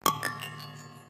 Sound of healing
bonus, coin, collect, energy, game, heal, high, item, life, life-up, money, object, pick-up, point, positive, potion, power-up, success, up, win